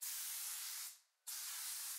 duster can edit reaper record zoom h1
cleaning, duster-can, loop, percussion-loop, spray-can, spray-paint